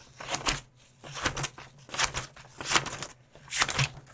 Scissors cutting paper, that's about it